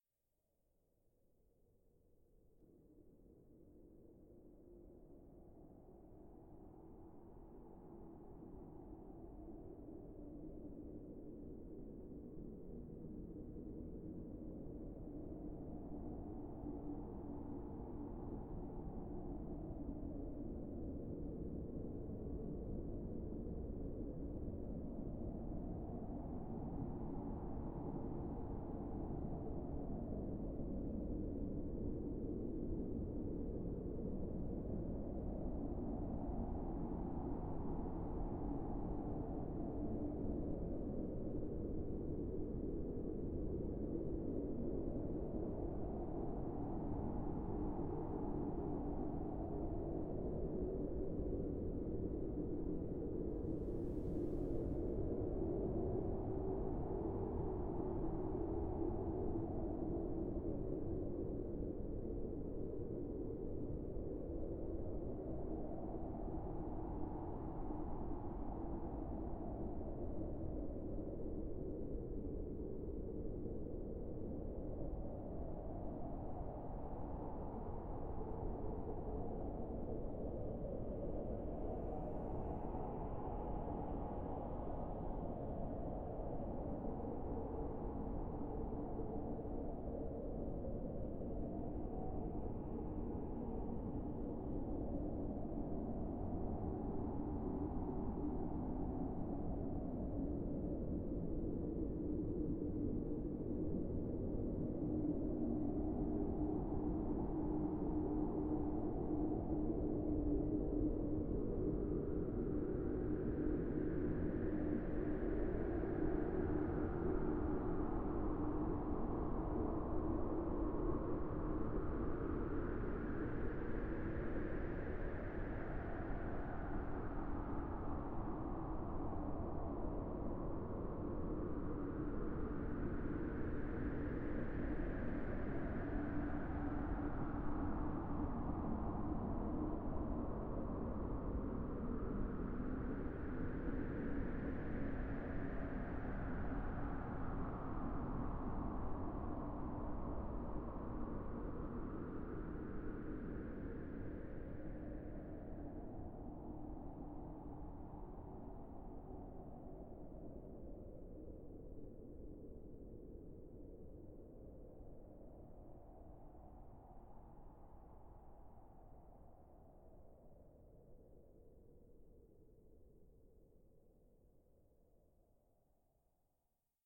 Wind ambience made with Audacity.